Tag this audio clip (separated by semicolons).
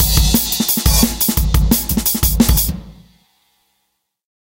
bass; beat; dance; drum; drum-loop; groovy; hard; jungle; kick; loop; percussion-loop